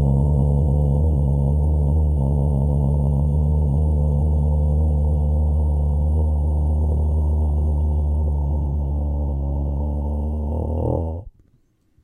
GdlV Voice 4: D2
Unprocessed male voice, recorded with a Yeti Blue
male, voice, dry, vocal, human